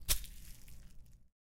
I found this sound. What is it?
Grass step
Step on paper